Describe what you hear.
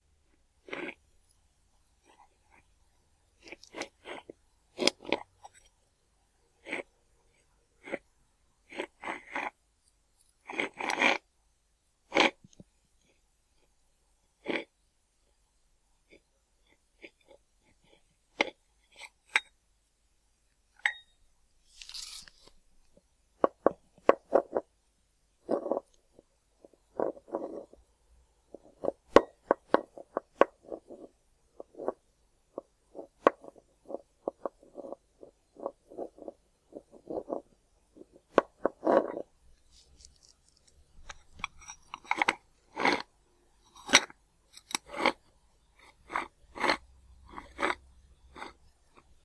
rock friction
It is the sound of a ceramic plate and a ceramic plant pot against a stone floor. Useful to simulate moving rocks sound.